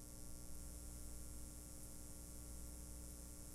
static-hum
Loopable static sound from speakers. Also sounds like the hum from fluorescent tube lights.
Static, hum, lights, fluorescent, electricity